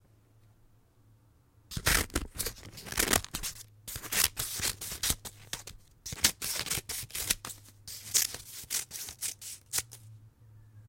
siscors cutting paper
Recording of metal scissors cutting through paper.
cutting, paper, scissors